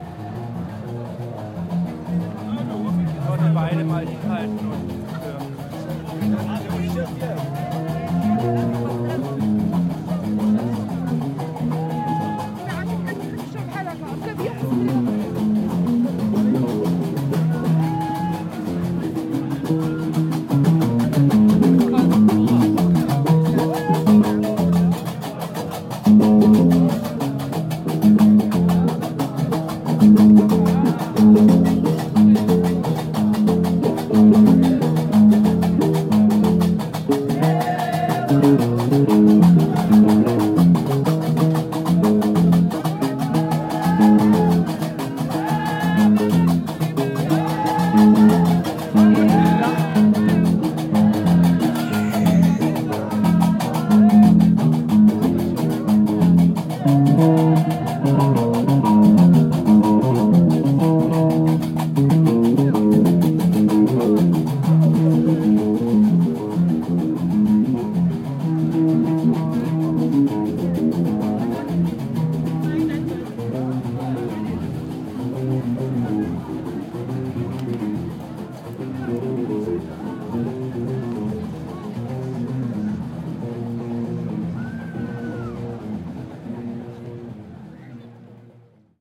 Musicians Jamaa el Fna Everning 1
Evening Atmosphere with street musicians on the buisy place Jamaa el Fna in Marrakech Marokko.
african, Atmosphere, el, Fna, Jamaa, Marokko, Marrakech, Music, north, Public, Travel